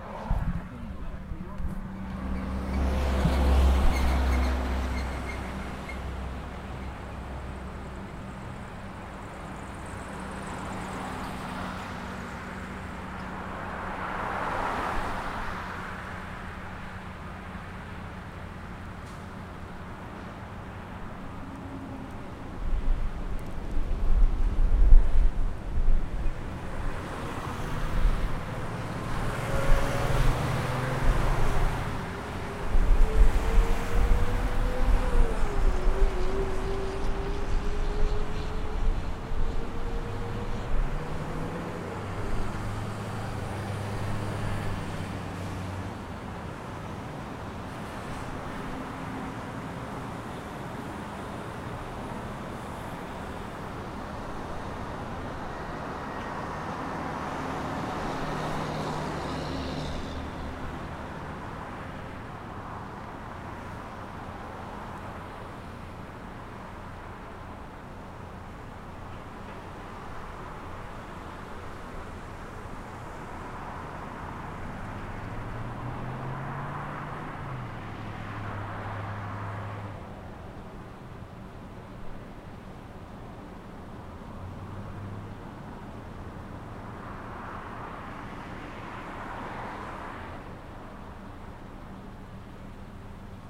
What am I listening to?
Waiting at a red light recorded with laptop and Samson USB microphone.